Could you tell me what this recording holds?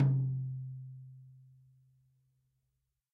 X-Act heavy metal drum kit==========================Drum kit: Tama RockstarSnare: Mapex mapleCymbals: ZildjianAll were recorded in studio with a Sennheiser e835 microphone plugged into a Roland Juno-G synthesizer. Cymbals need some 15kHz EQ increase because of the dynamic microphone's treble roll-off. Each of the Battery's cells can accept stacked multi-samples, and the kit can be played through an electronic drum kit through MIDI.